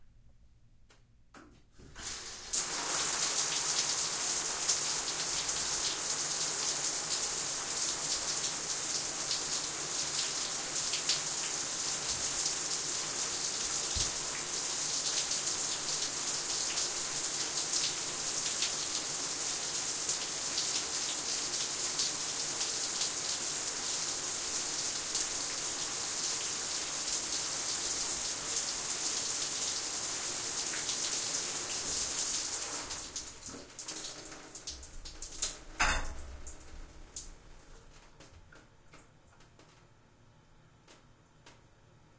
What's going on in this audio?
Shower longer faucet on and off
water, faucet, Shower